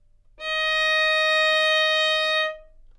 Violin - Dsharp5
Part of the Good-sounds dataset of monophonic instrumental sounds.
instrument::violin
note::Dsharp
octave::5
midi note::63
good-sounds-id::3624